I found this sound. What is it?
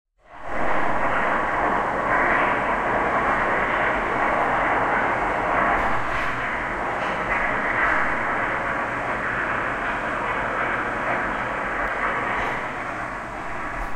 industrial ambience
An edited recording that sounds like what it might be like inside a chemical factory. Actually, it's a slightly modified version of my bubbling brew recordings. Enjoy!